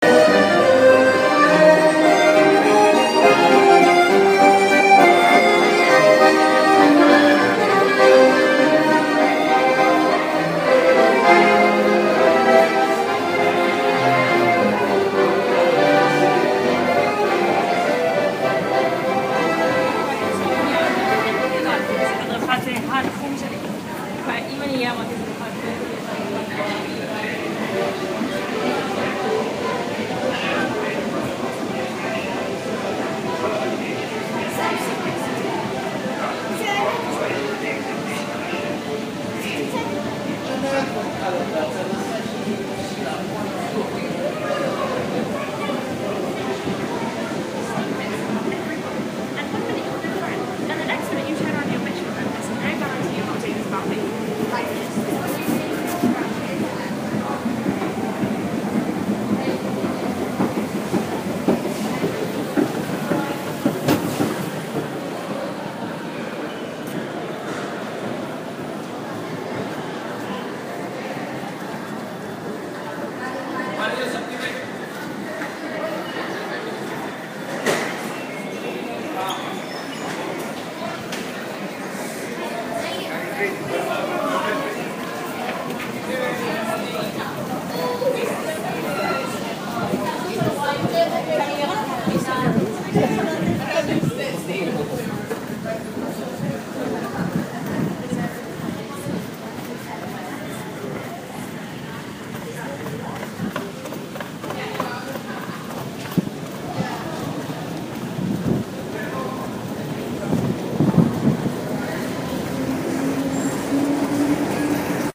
London Subway accordion music
Sound of London subway, where we can hear an accordion being played